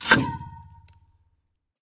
Construction tube hit sharp scrapy attack
Scrape on plastic construction tube
tube, hit, percussive